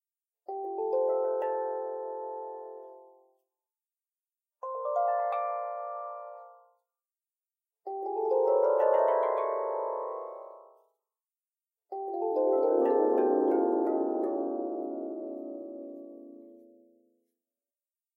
4 sound effects (appearing/disappearing)
These are 4 simple melodies recorded on my instrument and then edited in audacity.
recorded with - xiaomi A1
instrument - Luna Drum
appearing, application, computer, disappearing, effect, galaxy, game, machine, magic, sound